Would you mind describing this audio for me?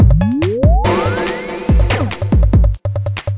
insect dance loop
A weird electronic drum loop.